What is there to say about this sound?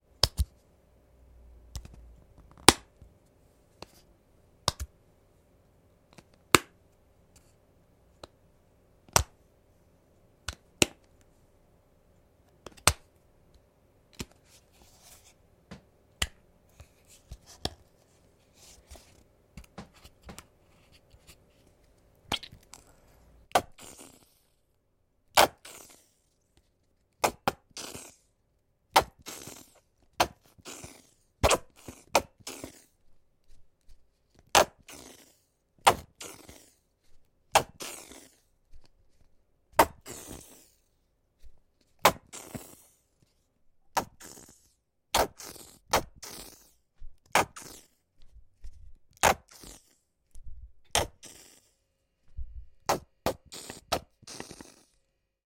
Ketchup bottle open and close and squeeze
Recorded with a Oktava MK-012, close of the bottle and a bit of constant noise (computer) behind.
Just a cut at 60 Hz. Enjoy your slippery mixes.
camping, mayonnaise, plastic, fries, sauce, picnic